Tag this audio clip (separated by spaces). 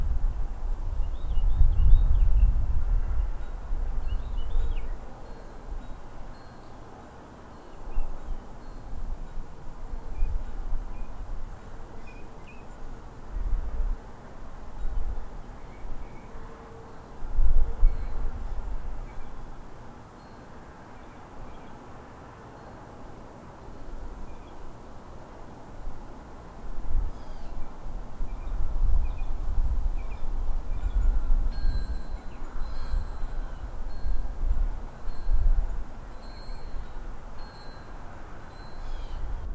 outdoor atmosphere patio field-recording